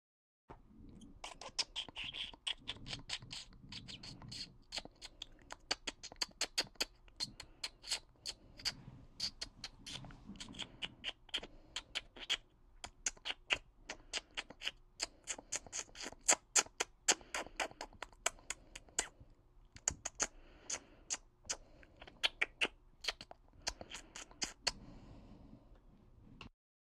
Curious chittering of some small beasty